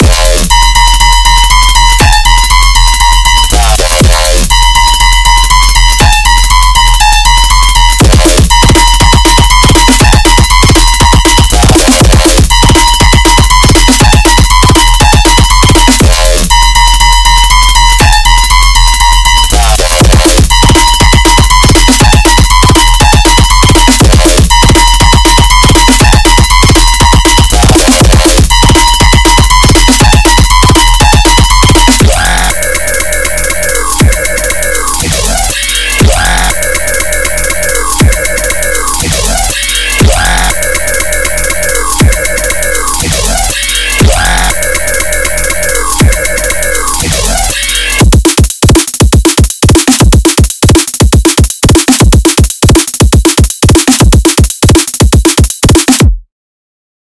A short EDM Dubstep song that was created using Sony ACID Pro, Because the audio was originally over 1 minute long, I have separated it into two uploads, so if you want the full thing, you're going to have to attach Part Two onto the end of Part One yourself
Epic tunes
Dubstep, EDM, Music, Techno, Fast-pace